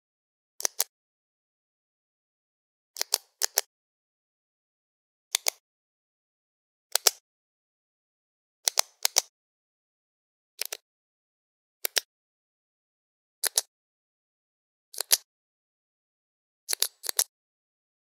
Clicking of a ball pen